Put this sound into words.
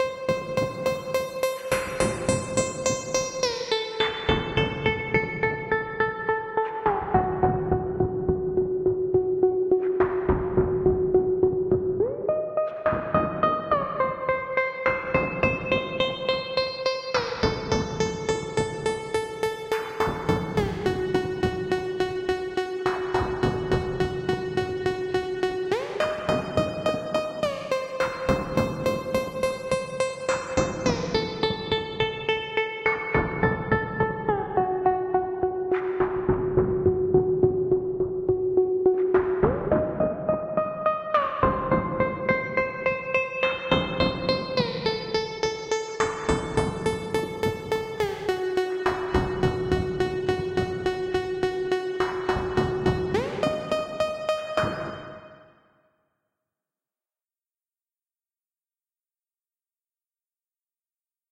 time night mares lead
a lead synth created in reason on the malstrom.
140, 70, dark, dnb, dubstep, goa, melodic, nostalgic, progressive, psybreaks, trance